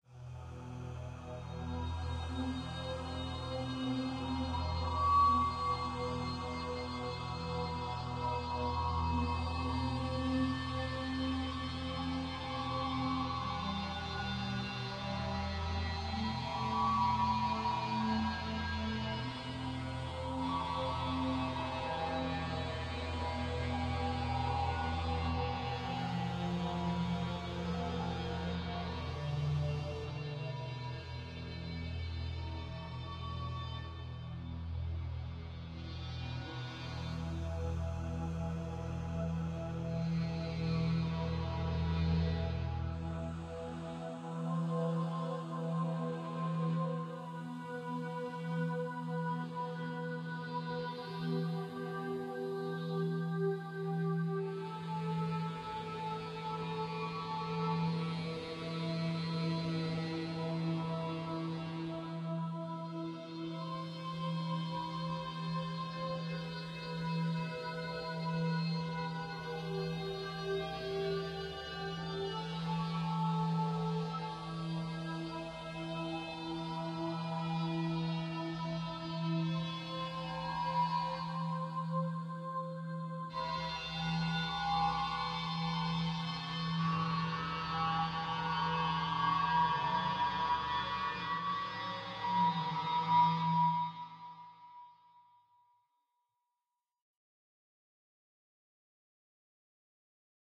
Last Resort Horror Ambiance
Chior and some effects.
ambiance, chior, creepy, dark, haunting, horror, knife, last, resort